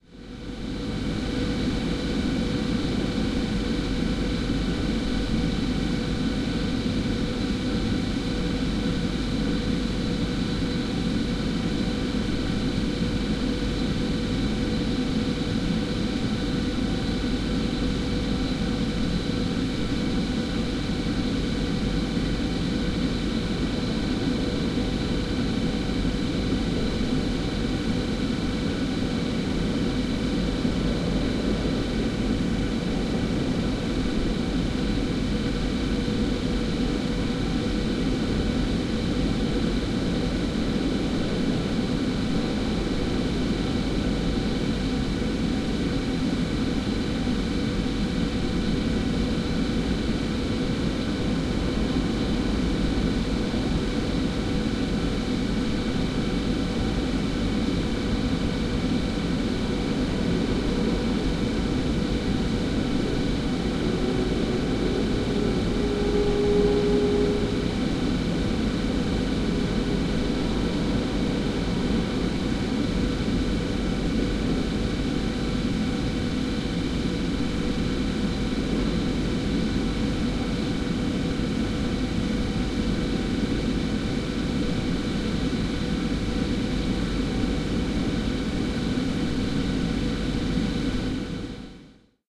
23.09.2015 sewage works in Torzym
23.09.2015: around 11.00 a.m. Noise of the sewage works in Torzym (Poland).
fieldrecording, noise, poland, sewage-works, torzym